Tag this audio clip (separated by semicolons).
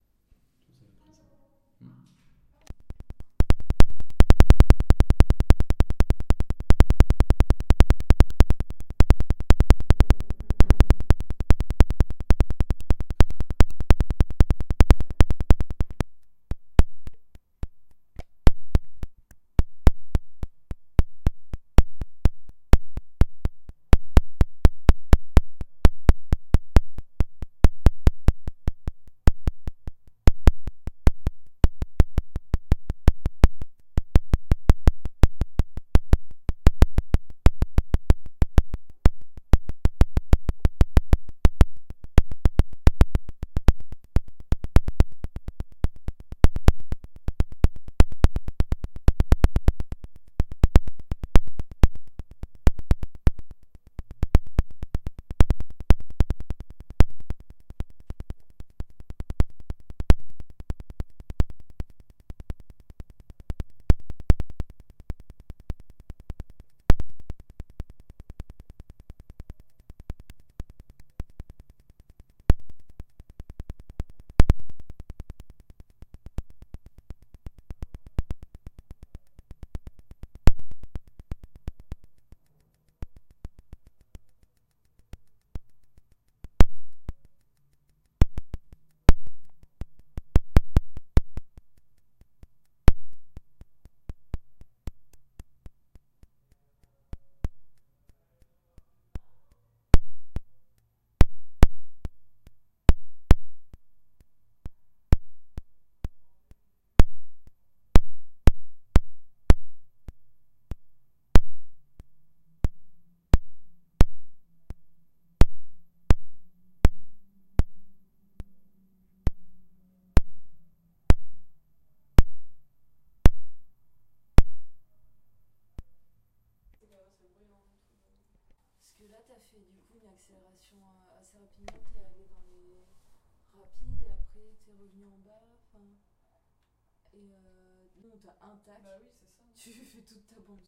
flicker; light; strobe; stroboscope